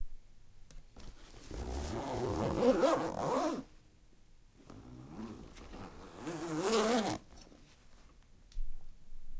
Zipper Open and Close
Opening up a rifle bag and then closing it a few times
airsoft, bag, closing, fast, gun, opening, pellet, rifle, slow, zipper